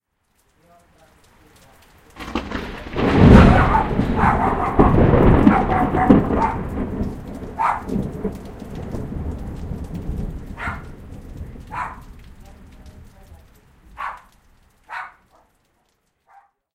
Huge Thunder clap
Verry loud thunderclap recorded during a thunderstorm in pretoria South Africa, at about 20:40 local time. This recording was done on the Zoom H1 handy recorder.
pretoria, field-recording